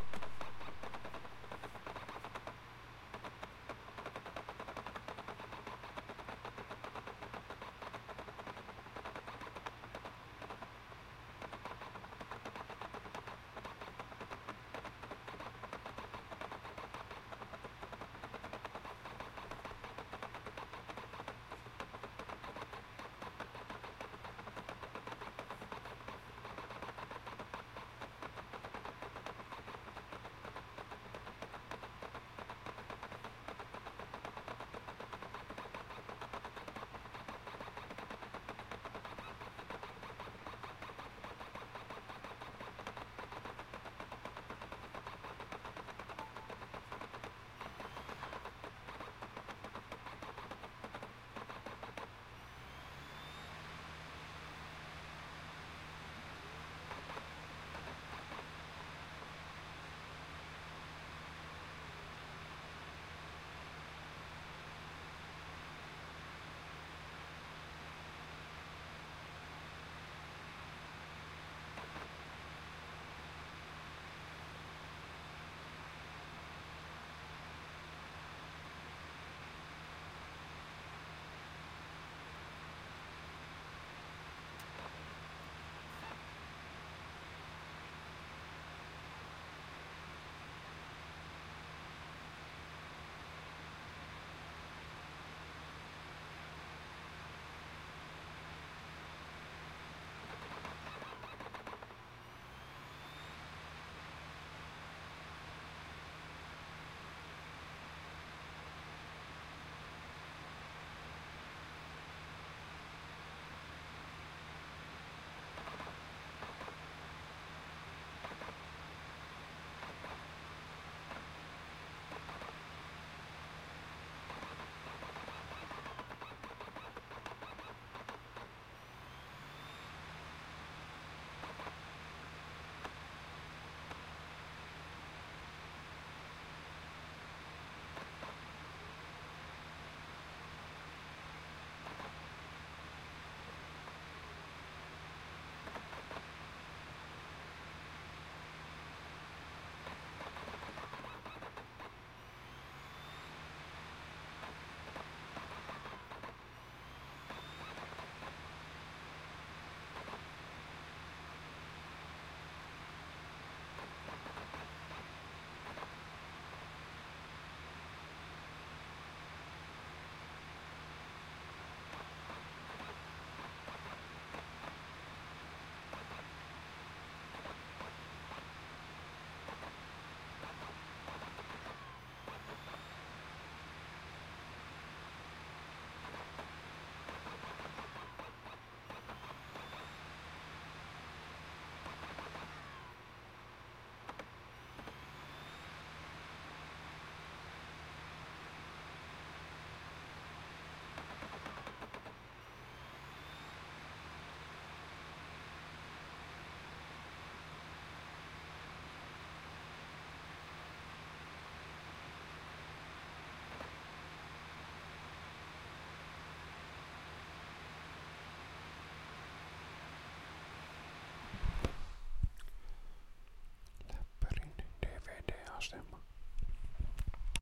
laptop dvd drive reading disc while installing operating system3
This was recorded while I was installing operating system from optical disc to laptop. Recorded with Zoom h1n
reading, disc, drive, Laptop, dvd